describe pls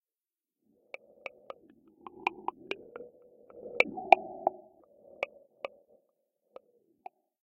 Alien Voice Crack
A little alien-speech effect we composed in Serum.